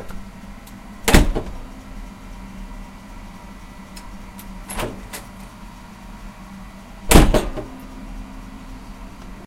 Messing with the door next to the heater recorded with Zoom H4n recorder.